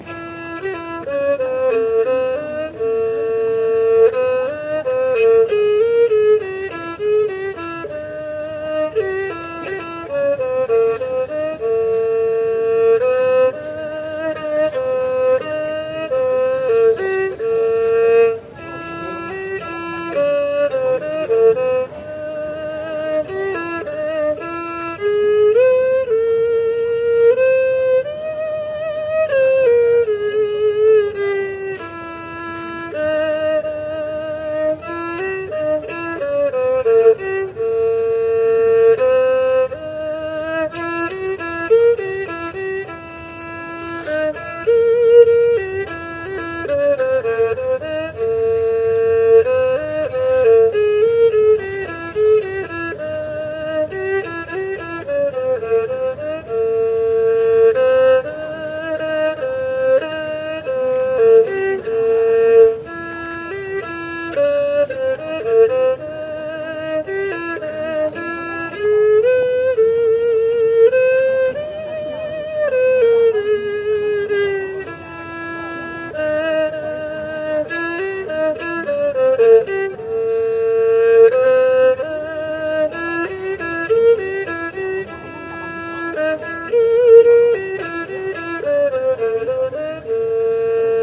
20090428.chinese.violin
street musician doing a Chinese-violin ('erhu') performance near Plaza Nueva (Seville). Edirol R09 internal mics
global-village, seville, two-string-fiddle, street, music, chinese-violin, field-recording, erhu, city